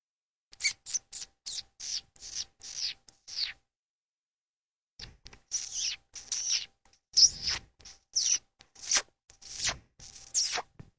kissy sounds
kissy sound. Was intended to be used as a rat squeek. I was going to raise the pitch and add some reverb. No matter what I did, it sounded like... a kissy sound. So heres a kissy sound - recorded with a cheap microphone into a DELL with an Audigy soundcard
effect, soundeffect, human, squeak, kiss